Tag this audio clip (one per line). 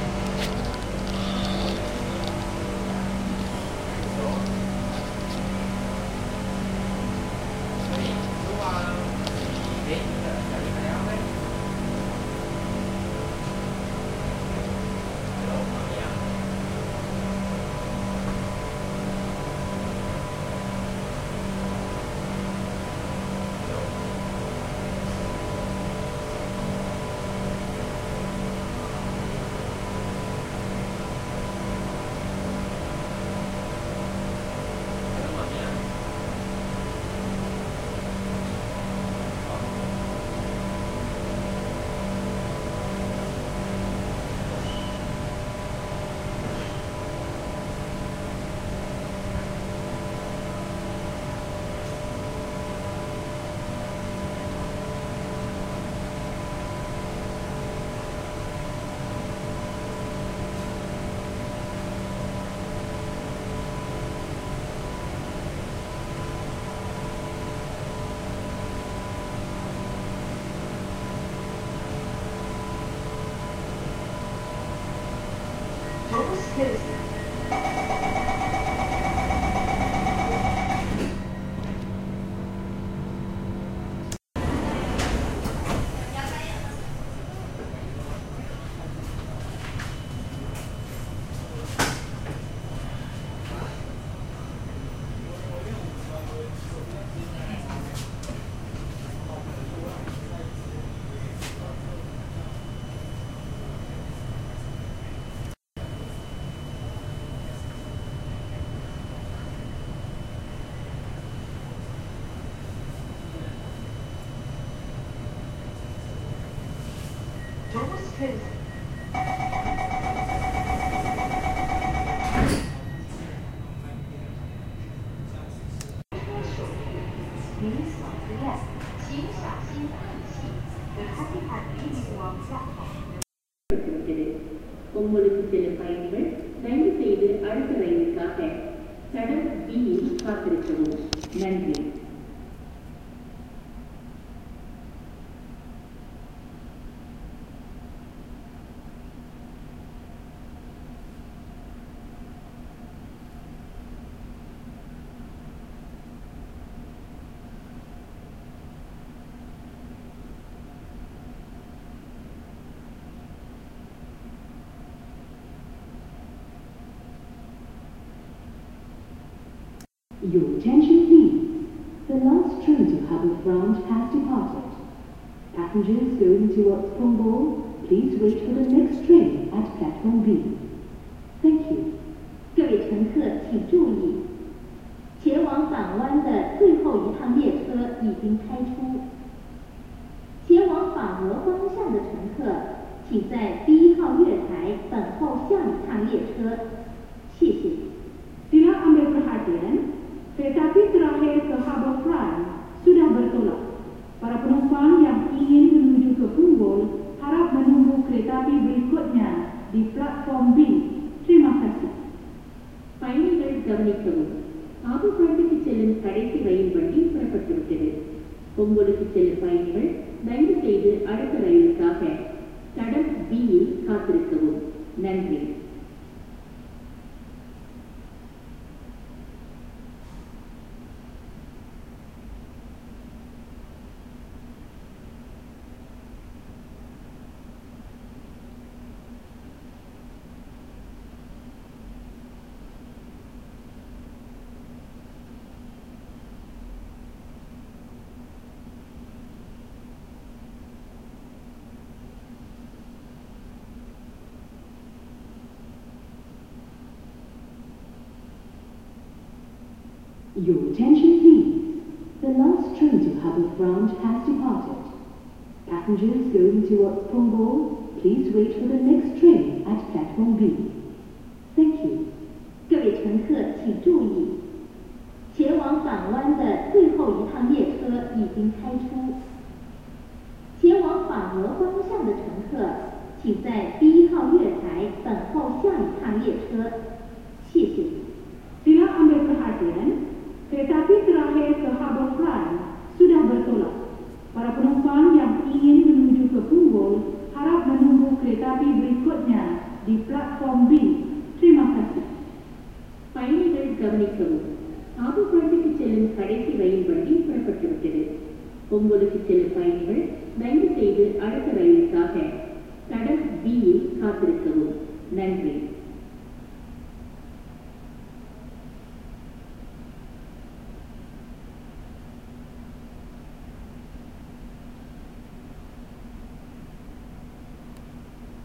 train
singapore
mandarin
mrt
malay
last-train
tamil
english
indian
chinese